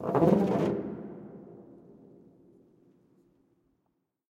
Recordings of different percussive sounds from abandoned small wave power plant. Tascam DR-100.
drum, industrial, ambient, hit, field-recording, metal, percussion, fx